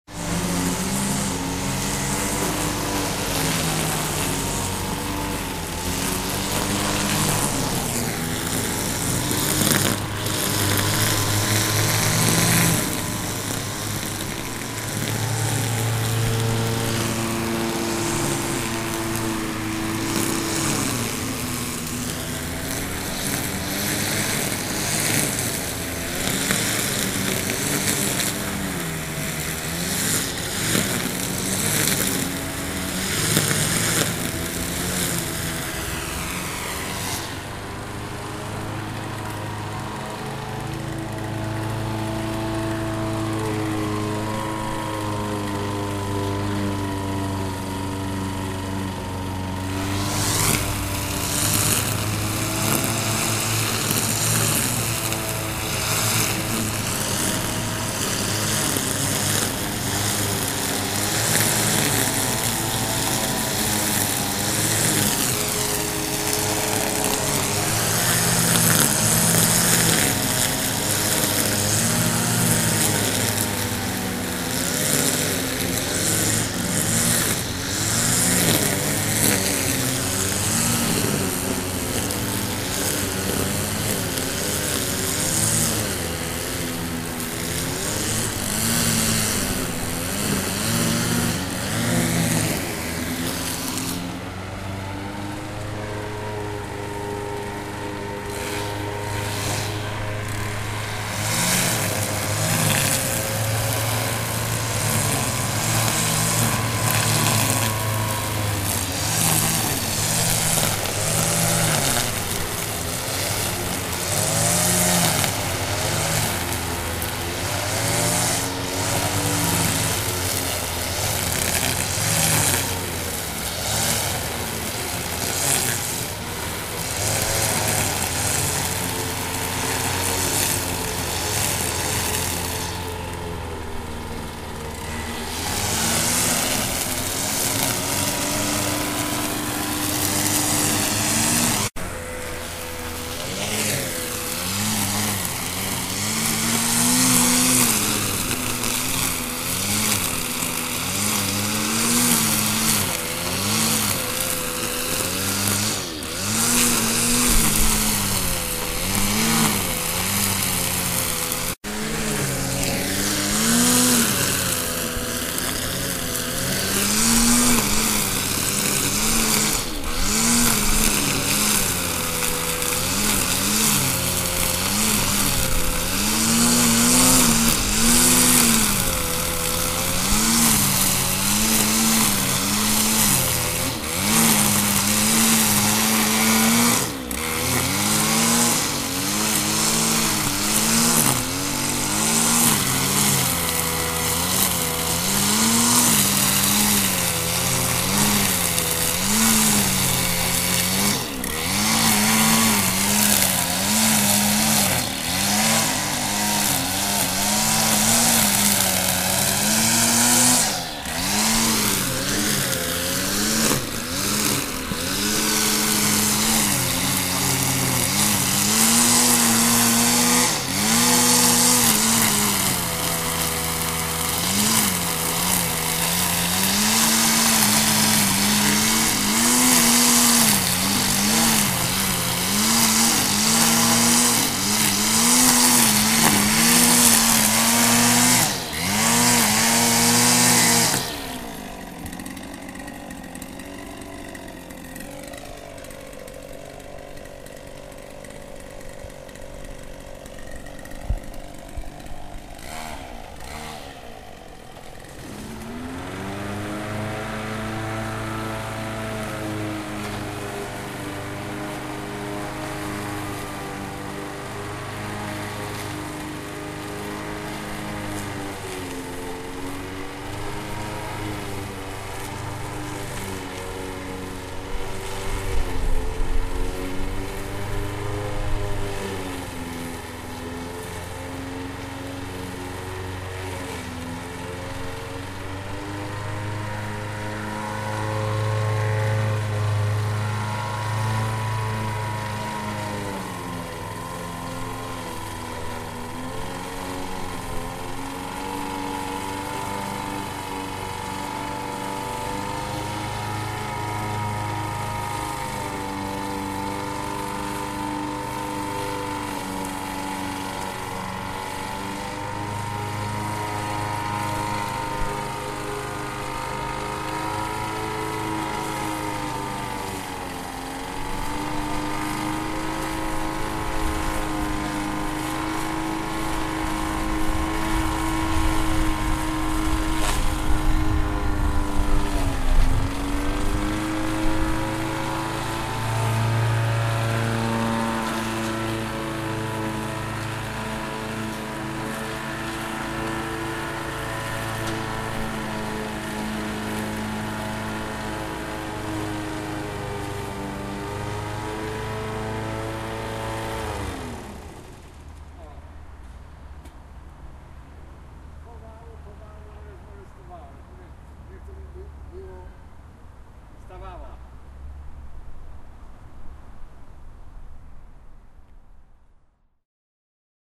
mowing the lawn,grass,mower,lawn mower
mowing the lawn,grass, engine,
spring cleaning, mower,lawn mower